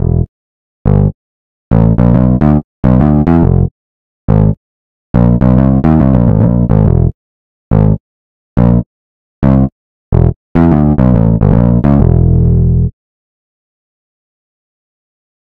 Heavy guitar trash (140 bpm)
Arcade, E-guitar, Guitar, Heavy, Lmms, Lo-Fi, Pitch, Plucked, Rock, Strings, Synthesizer